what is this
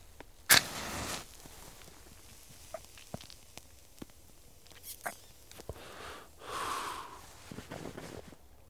Lighting the cigarette in the forest